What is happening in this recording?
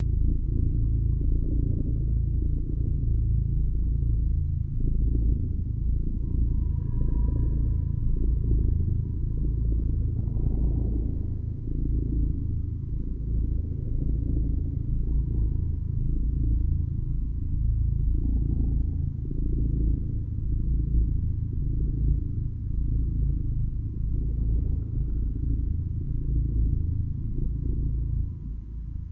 purr clip
This sample was happily granted to us by my cat, an 18 pound red McTabby, Angelo. I took this sample of him happily purring on my lap, added a brain wave synchronization and then put in an interesting kind of reverb that makes the sound move back and forth from left to right. There are some very interesting frequencies in there if you listen carefully. A cats purr can actually be very complex, soundwise... and I think this is a great example of that. LISTENING TIP: USE A HEADSET.
brain-wave-synchronized, cat, meditation, purr, soothing, surreal